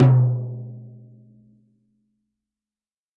Slingerland3PlyMaplePoplarMapleRockKitTomHigh12x8
Toms and kicks recorded in stereo from a variety of kits.
acoustic, drums, stereo